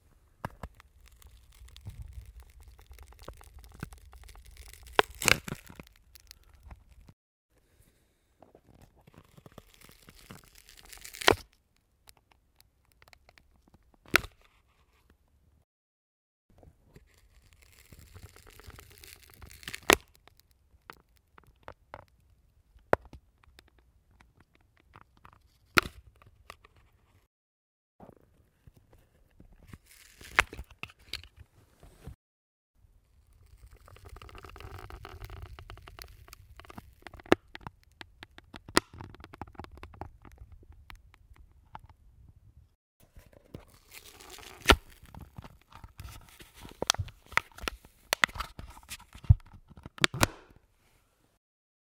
caja de gafas
box, caja, close-up, crack, gafas, glasses